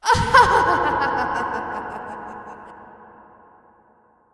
evil laugh 1
Evil laughter recorded for a production of Sideways Stories from Wayside School. Reverb added.
cackle, evil, female, girl, laugh, laughing, laughter, woman